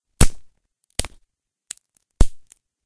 breaking branch02
branch, break, breaking, tree, twig, wood